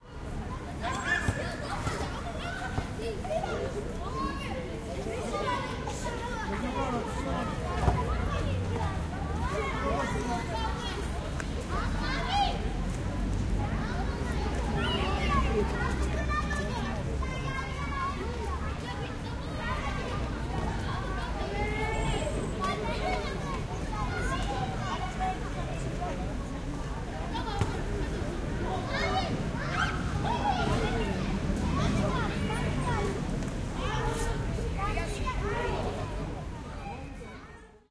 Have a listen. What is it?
Children, playing on park
recorded in Turkey /ISTANBUL 2008 /
baran gulesen